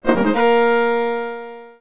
Victorious fanfare that would be heard after winning a battle in an rpg.
Created via Milkytracker.
This sound, as well as everything else I have upload here,
is completely free for anyone to use.
You may use this in ANY project, whether it be
commercial, or not.
although that would be appreciated.
You may use any of my sounds however you please.
I hope they are useful.